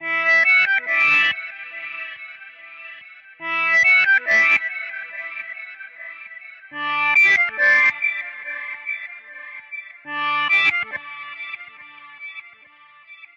Live Edge Reverse Melody 02
live reverse melody